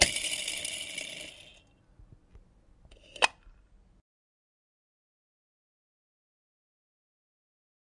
Revolving ashtray 01
Pushing a Revolving Ashtray. Zoom H1 recorder
ashtray, glitch, metal, percussive, revolving, spin